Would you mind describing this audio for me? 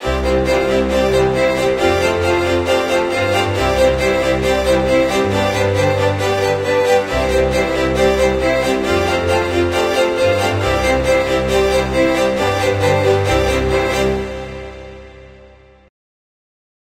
A short happy symphonic string section I composed. These are synth strings.